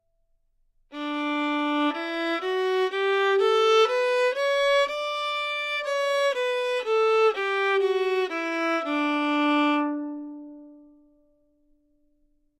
Violin - D major
Part of the Good-sounds dataset of monophonic instrumental sounds.
instrument::violin
note::D
good-sounds-id::6304
mode::major